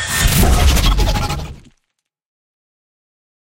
Another sound produced using granular synthesis and stuttered delay. I combined recorded samples of water, gravel, drum kicks, and metal cans colliding to create this sound.